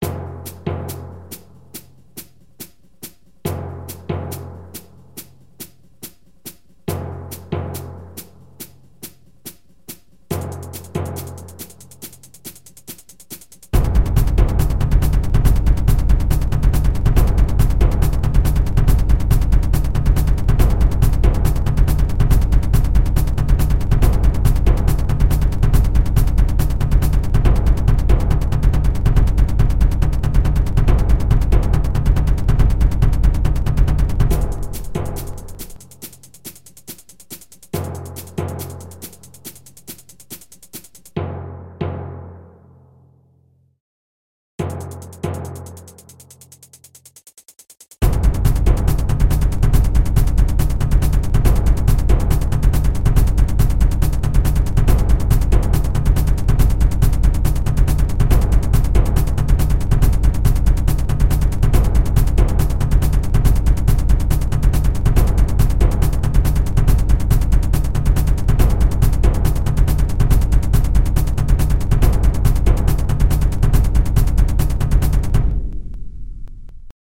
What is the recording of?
Zombie Chase
Chase drums made 100% in LMMS Studio. Sounds: Hihat, bassdrum acoustic, brush, and tons. Action inspired in survival sombie games and movies.
ACTION, CHASE, CINEMATIC, DRUMS, FAST, HORROR, MUSIC, SURVIVAL, WILD, ZOMBIE